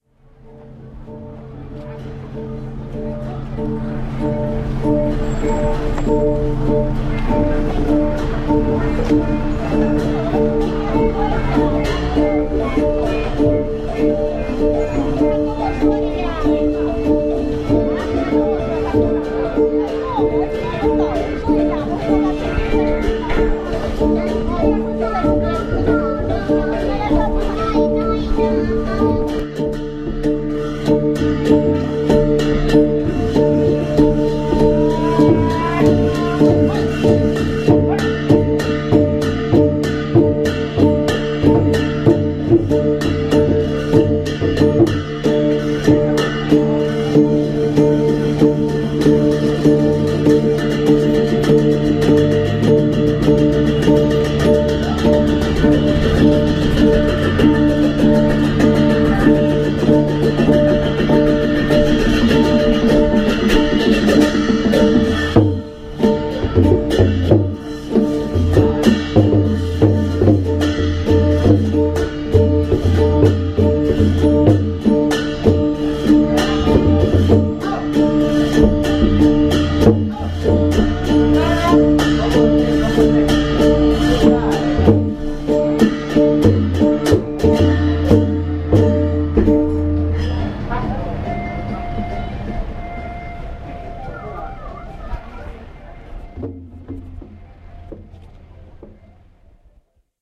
Thailand - Cymbals & Drums
Cymbals, drums, one voice. Folk music outside of Wat Phra That Doi Suthep.
You hear one instrument -besides the drum and the common pair of cymbals- which name I ignore -any help with this will be deeply appreciated!
This instrument apparently is very common in north Thailand and Laos. It consists of cymbals hanging in a wood structure that operates with strings! I mean, a totally alien thing for me.
Sound record it with -sorry- a GoPro camera Hero4, then edited with Audacity.
Ambient, Asia, Buddhism, Chiangmai, Cymbals, Drum, Drums, Folk, Laos, Music, Strings, Temple, Thailand, Traditional